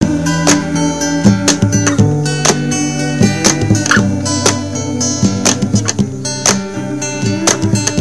GREEN Mixdown
A collection of samples/loops intended for personal and commercial music production. For use
All compositions where written and performed by
Chris S. Bacon on Home Sick Recordings. Take things, shake things, make things.
bass, percussion, rock, indie, acapella, whistle, Indie-folk, original-music, loops, guitar, drums, free, drum-beat, synth, melody, Folk, piano, samples, loop, looping, acoustic-guitar, beat, voice, sounds, vocal-loops, harmony